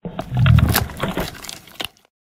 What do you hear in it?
Some sounds designed from only animal sounds for a theatre piece i did.
gore, sound-design, hit, kick, stinger, horror, Animal, game, cinematic, boom, low